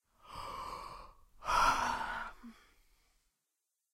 A generic yawn